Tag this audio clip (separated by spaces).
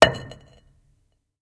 concrete impact stone strike